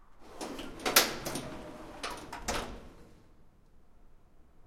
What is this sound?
elevator door, city, Moscow
Elevator door closing, some ambience from outside the building.
Recorded via Tascam Dr-100mk2.
lift, elevator, closing, close, whiz, clank, door